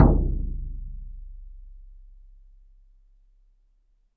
Shaman Hand Frame Drum 09
Shaman Hand Frame Drum
Studio Recording
Rode NT1000
AKG C1000s
Clock Audio C 009E-RF Boundary Microphone
Reaper DAW